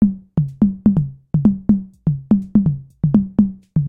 tom bass 2
synthetic low toms loop